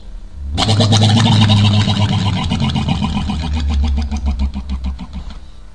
This is the sound of a monster that hides in the closet and eats kids. It is actually my voice with the speed reduced to half.